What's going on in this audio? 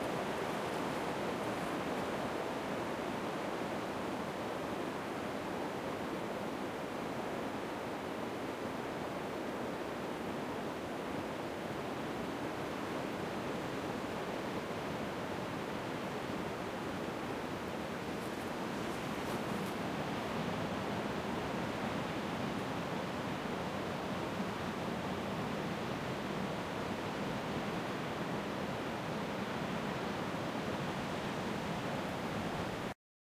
"queixumes dos pinos" means that the galician trees are talking thankis to the wind...
it's a poem by Eduardo Pondal and the liryc of the Galician Himn
h4n X/Y
galiza, trees, wind